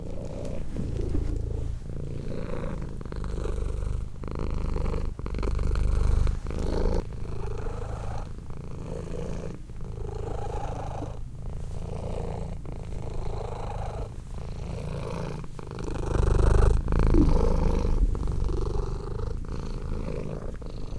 Alfred Purr
My cat Alfred has a chirpy purr.
Cat, Creature, Animal, purr